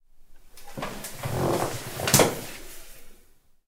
Stuhl - Polster, hinsetzen
Sitting down on an upholstered chair
chair, field-recording, sit, sitting, upholstery